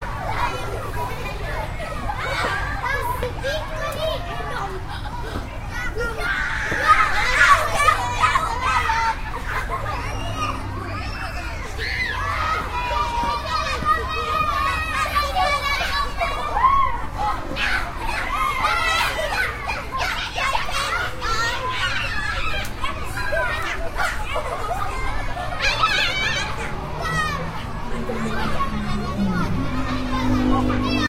Children playing in a playground in Rome. I used Tascam DR05.